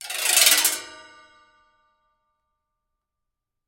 mar.gliss.resbars.dnup1
Sample of marimba resonance pipes stroked by various mallets and sticks.
gliss, marimba, pipes, resonance